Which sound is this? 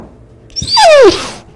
Dog Whine 3
animal, whining